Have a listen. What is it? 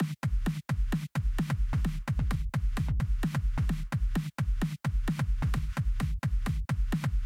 FL Drum Loop 01 Version2
awesome, bass, beat, cool, drum, drums, effect, fl, fruity, fruityloops, great, hat, kick, library, loops, music, short, simple, soundeffect